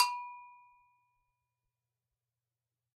gbell 6-2 ff
recordings of 9 ghanaian double bells. Bells are arranged in rising pitch of the bottom bell (from _1 to _9); bottom bell is mared -1 and upper bell marked -2. Dynamic are indicated as pp (very soft, with soft marimba mallet) to ff (loud, with wooden stick)
bell, gogo, double-bell, ghana, percussion, metalic